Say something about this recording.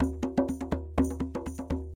tambour djembe in french, recording for training rhythmic sample base music.